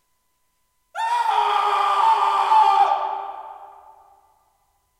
Scream3 Hissy
Out of the series of some weird screams made in the basement of the Utrecht School of The Arts, Hilversum, Netherlands. Made with Rode NT4 Stereo Mic + Zoom H4.
Vocal performance by Meskazy
weird, death, scream, horror, darkness, yelling, yell, disturbing, funny, angry, screaming, pain